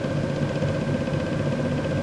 Household AC On Run Loop 01
My apartment is pretty old, still using those large window/through the wall AC's so here's a loop that could be used for a game or something.
Household, Loop